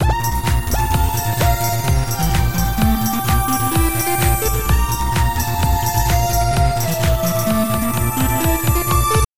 synth loop with percussion, 5 bar sequence, Bb maj, 128 bpm